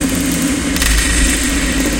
This is loop 128 in a series of 135 loops that belong together. They all have a deep dubspace feel in 1 bar 4/4 at 60 bpm and belong to the "Convoloops pack 02 - bare bone dubspace 60 bpm" sample pack. They all have the same name: "convoluted bare bone loop 60 bpm"
with three numbers as suffix. The first of the three numbers indicates
a group of samples with a similar sound and feel. The most rhythmic
ones are these with 1 till 4 as last number in the suffix and these
with 5 till 8 are more effects. Finally number 9 as the last number in
the suffix is the start of the delay and/or reverb
tail of the previous loop. The second number separates variations in
pitch of the initial loop before any processing is applied. Of these
variations number 5 is more granular & experimental. All loops were
created using the microtonik VSTi.
I took the bare bones preset and convoluted it with some variations of
itself. After this process I added some more convolution with another